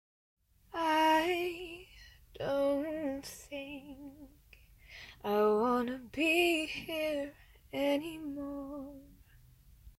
'I don't think I want to be here any more'
A female voice singing a line that could be used in a song. :) (Sorry for lack of detail, I'm pretty busy nowadays)